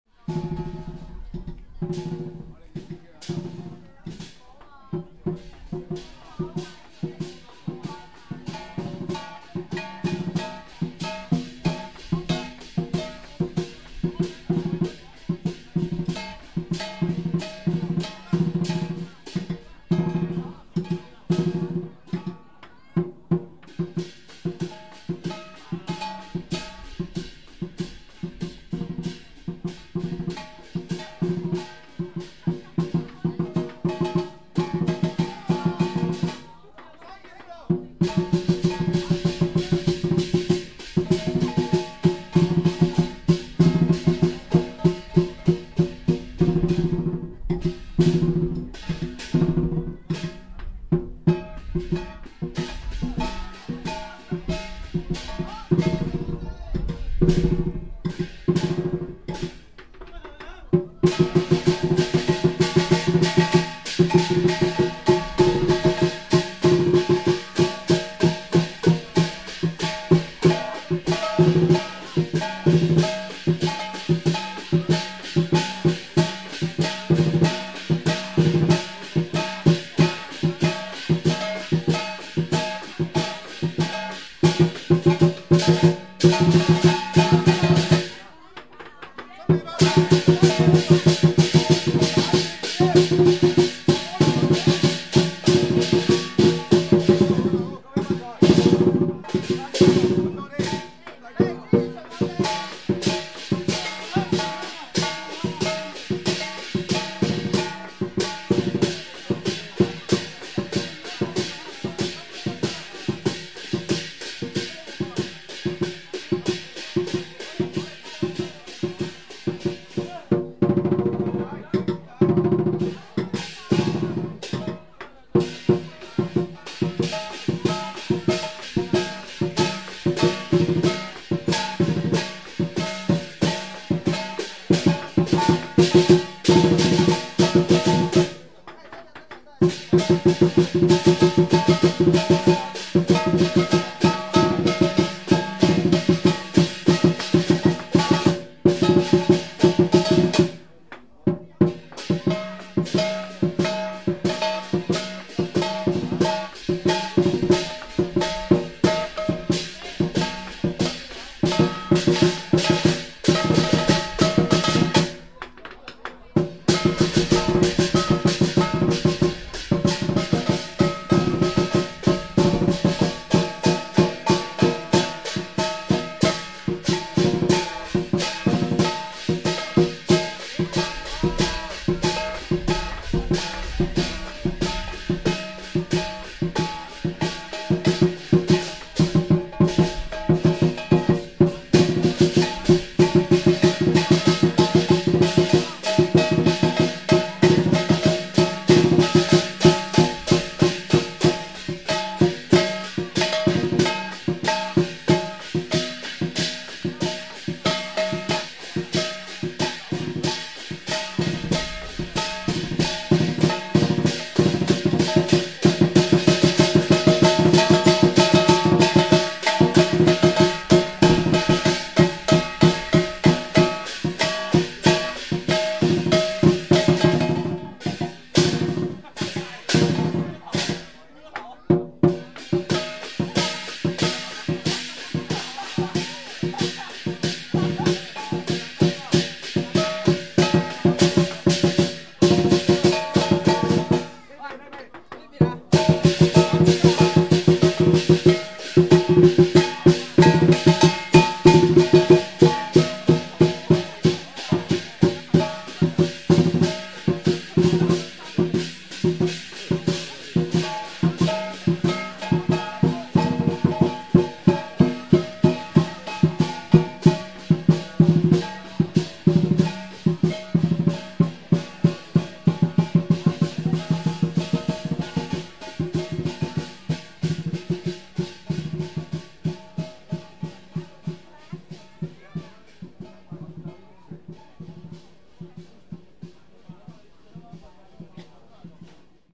VOC 190208-1327 HK DragonDancing
Chinese dragon dancing at Stanley Village market, in Hong Kong)
I recorded this audio file in Stanley Village market just after Chinese new year.
A dragon is dancing and jumping in front of each small shop of the market, and people are following it.
Recorded in February 2019 with an Olympus LS-100 (internal microphones).
Fade in/out applied in Audacity.